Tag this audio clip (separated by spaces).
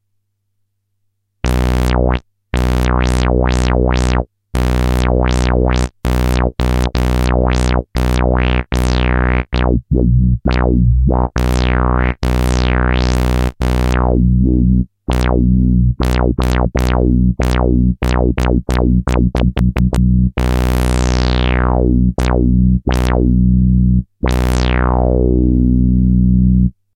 analogue Bass MC-202